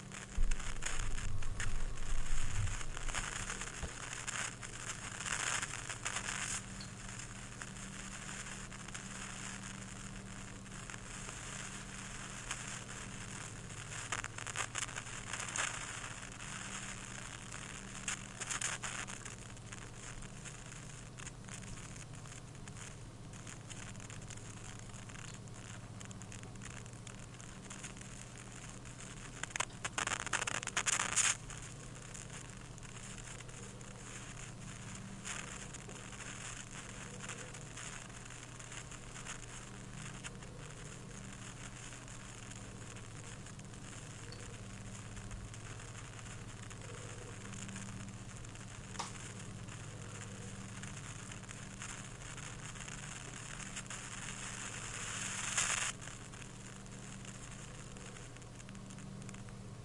My headphones started to screw themselves over while I was going for a walk with them and it started pouring in the middle of my walk. Which made my headphones pretty much unusable and it seemed to make this strange static noise even when turned off. It was actually kinda creepy but I did realise that this would make for a good sound for a videogame or movie that needs static of some kind. It's just too bad my headphones don't work anymore.
It would be appreciated if you did though!